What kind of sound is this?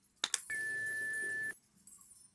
GIRARD Melissa 2020 2021 clicbip
I created this sound so that it sounds like an answering machine, but there is a subtle noise like scintillation.
Step by step :
- I clipped the cap of a tube of cream
- I recorded the sound of a dried leaf falling on a table
- I recorded the sound of hangers
- I accelerated it and amplified it
- I generated a track Sinusoide 1750 Hertz
clic, interference, muffled, machine, off, cuts, switch, digital, click, phone, mutate, hi-tech, sound, button, answering, bip